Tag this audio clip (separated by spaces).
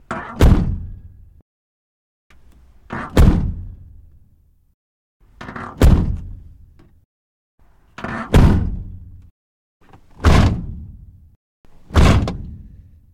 mechanism inside car vehicle motor bus interior road truck automobile master auto door traffic renault foley shut engine mobile close big